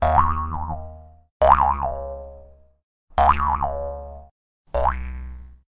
boing sounds
Series of sounds from a jews harp